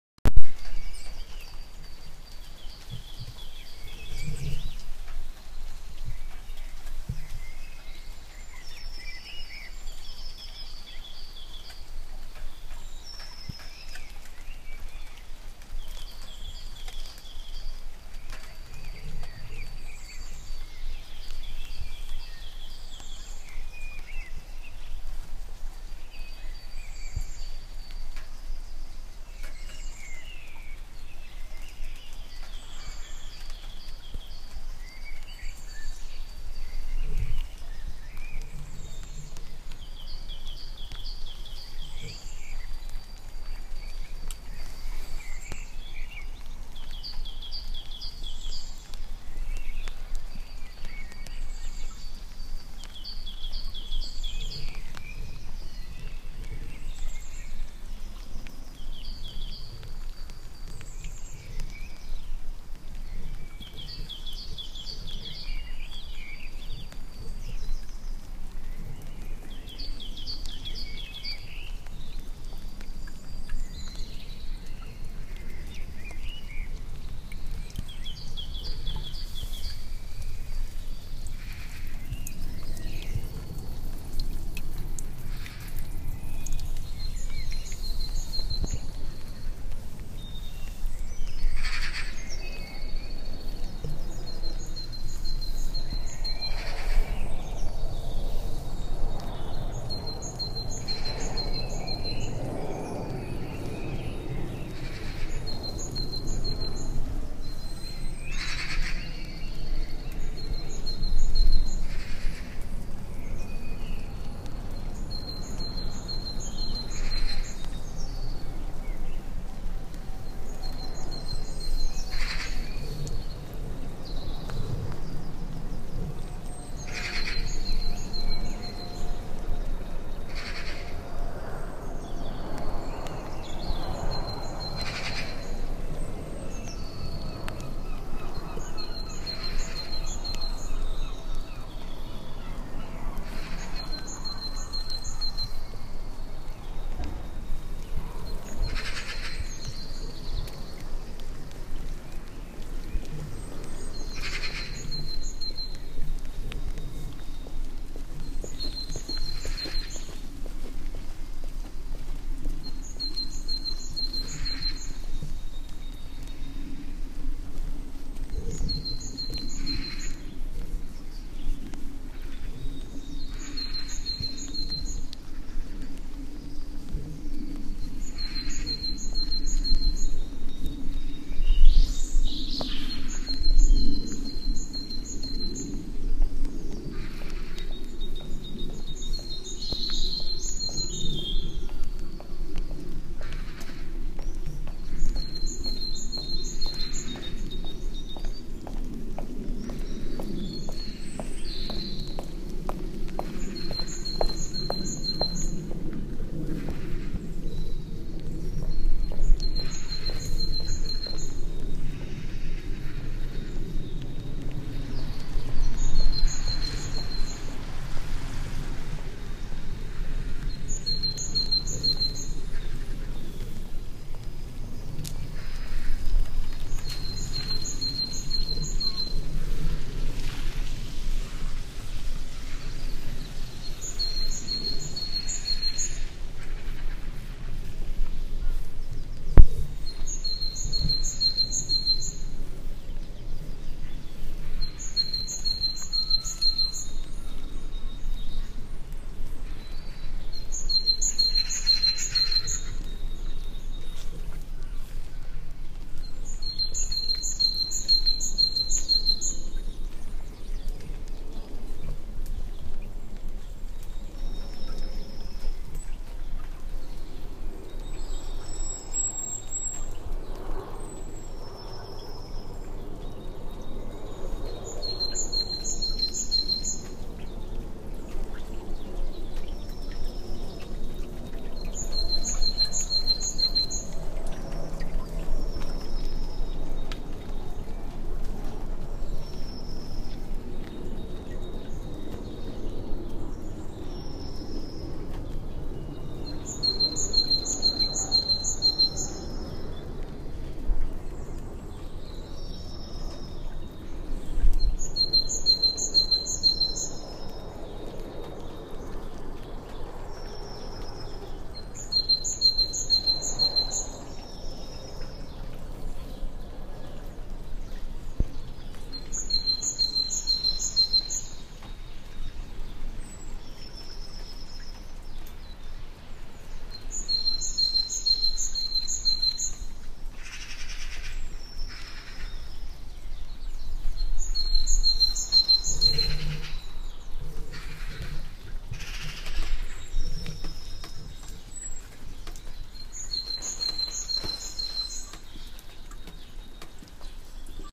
The Birds Of London
Morning after night rain 15 April 2016 near Northfields tube station.
Early birds of all kinds: singing birds, flying airplane (from Heathrow), people walking to the job, first cars. Apogee MiC and iPhone, mono.
It’s my first soundscape, please help to define bird species on this record.
Thanks